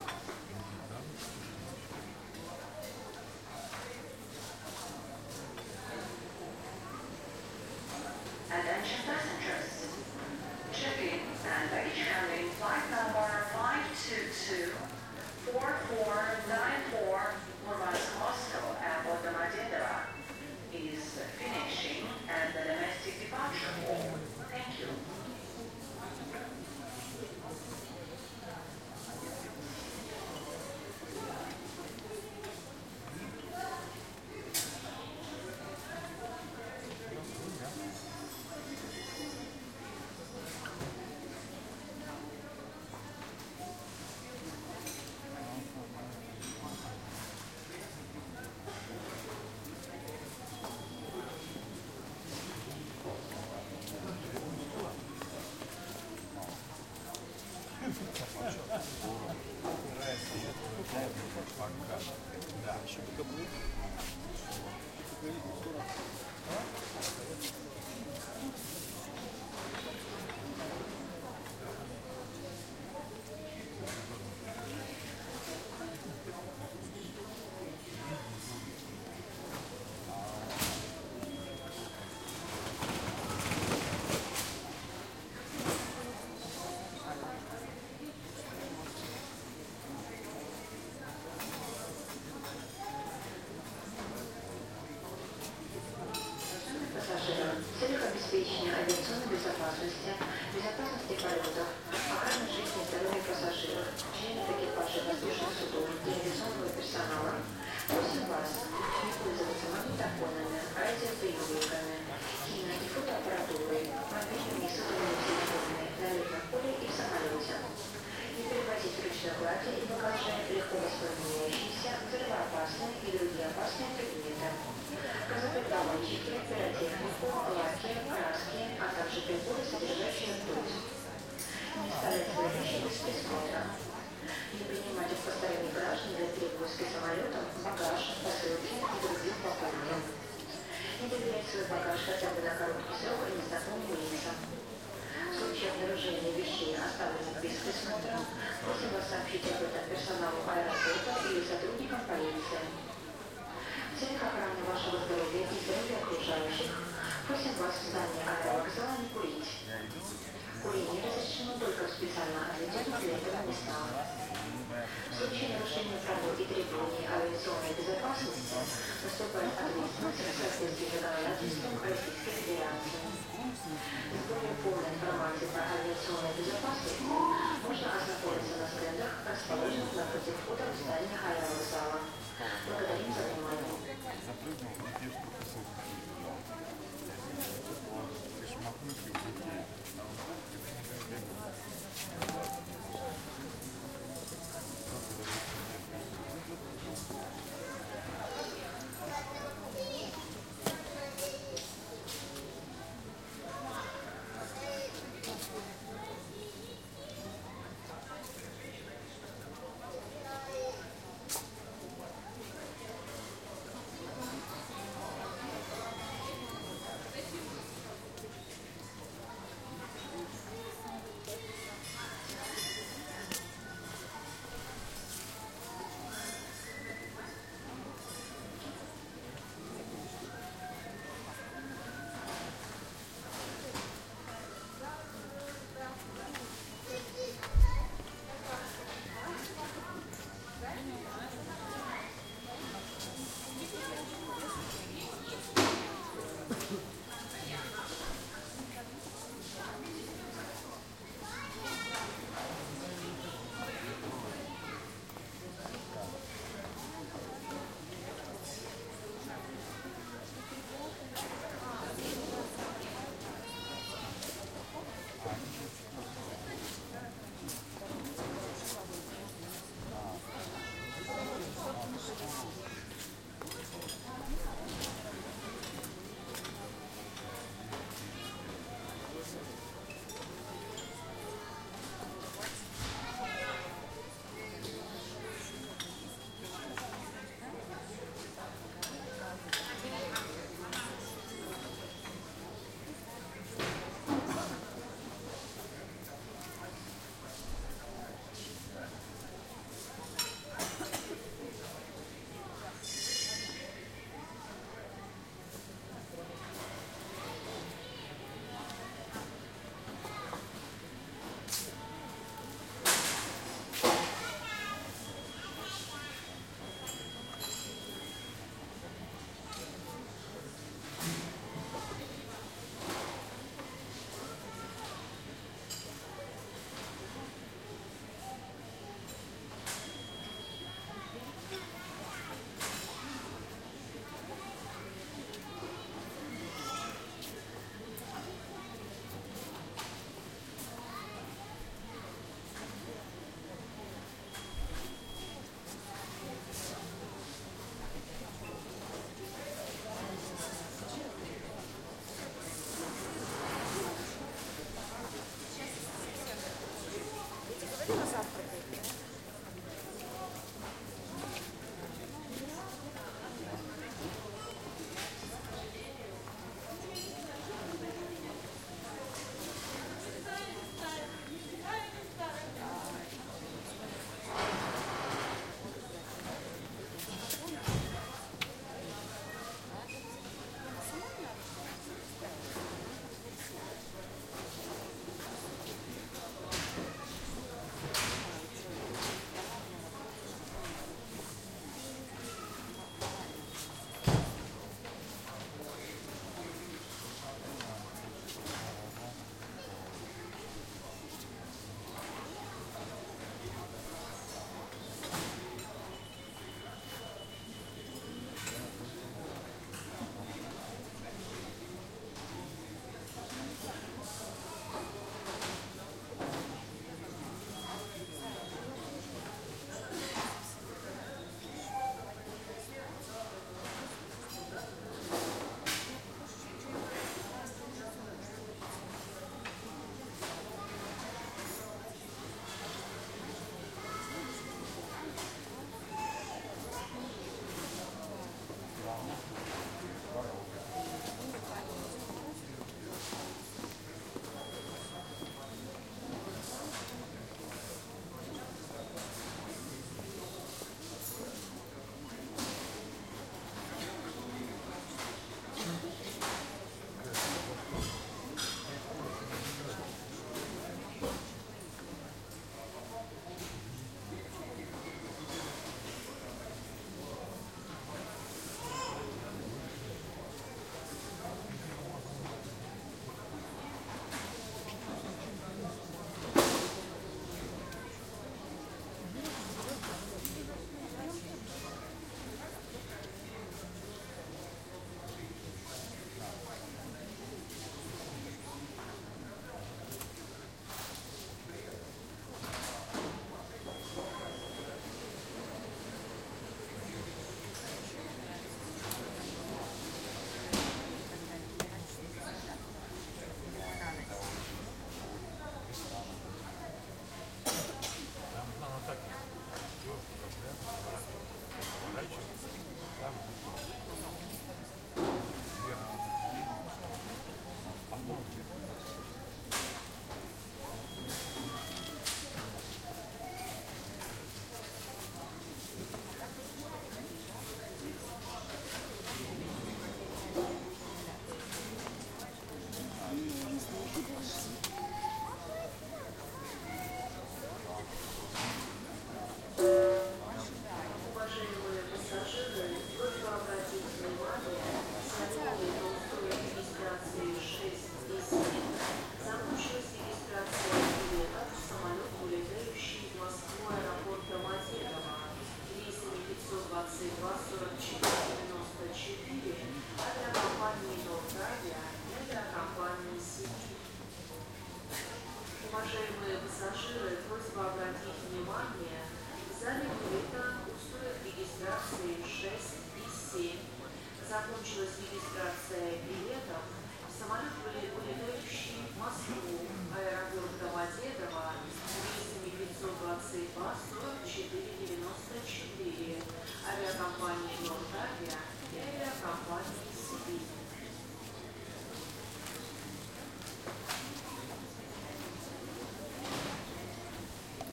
Ambience recorded in Murmansk Airport (Russia) in departures hall. Announcements, people talking. June 2016.
Recorded on Tascam DR - 07 II, built in mikes.